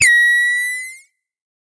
Moon Fauna - 146
Some synthetic animal vocalizations for you. Hop on your pitch bend wheel and make them even stranger. Distort them and freak out your neighbors.
fauna, sfx, sci-fi, synthetic, animal, vocalization, creature